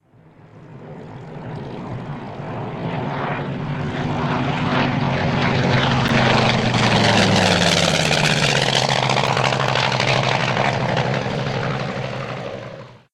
Low Airplane Fly By
A real FW-190 performing a low-altitude flyby next to the camera. Recorded at Flying Legends Airshow, edited in Audacity for removal of background noise.